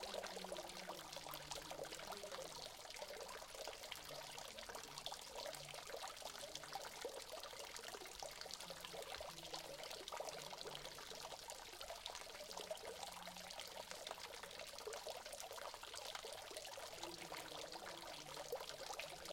Water splattering sound on a arabic-like fountain. Rode NTG-2 into Sony PCM-M10 recorder.